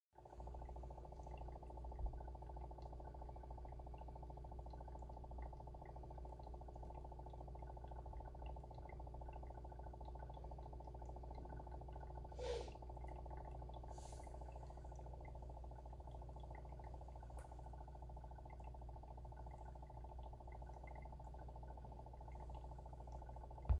The sound of an air filter in a fish tank. Apologies for the sniffle in the middle.